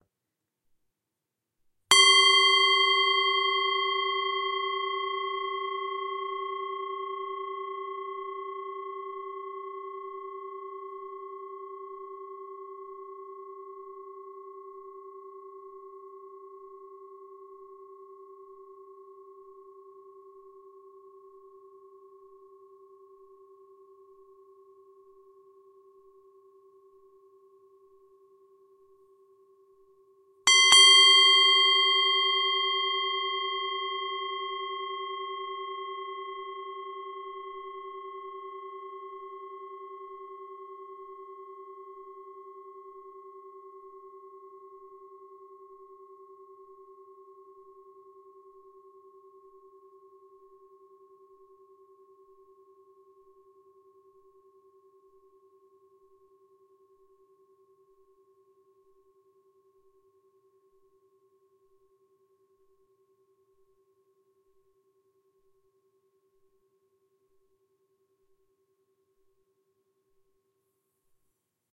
Sound sample of antique singing bowl from Nepal in my collection, played and recorded by myself. Processing done in Audacity; mic is Zoom H4N.
hit, ting, metal, tibetan, singing-bowl, clang, ring, tibetan-bowl, bowl, bell, percussion, harmonic, gong, metallic, bronze, brass, chime, meditation, strike, ding, drone